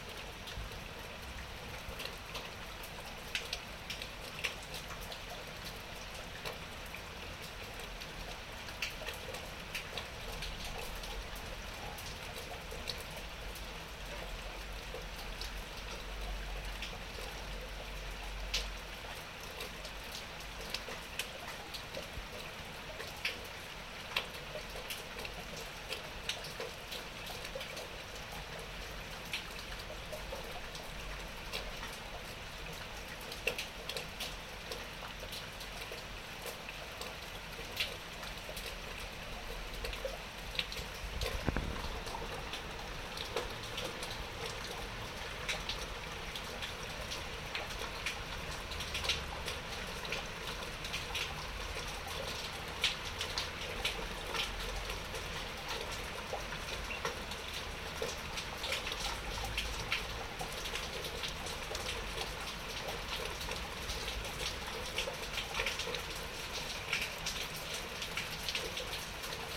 Rain on a roof with sounds of rain in guttering recorded with a Marantz PMD660 with an external microphone.